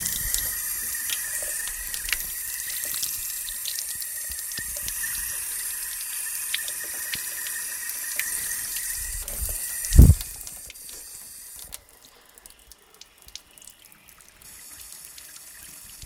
Here is sounds that pupils have recorded at school.
france, lapoterie, rennes, sonicsnaps